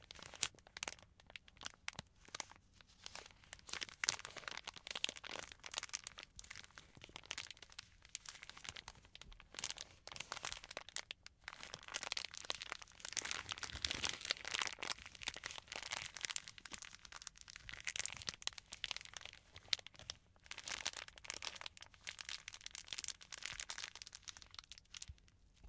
Ziplock bag play
Playing and handling a Ziplock bag. Recorded with Tascam DR-60DII and Sennheiser MK600 boom mic.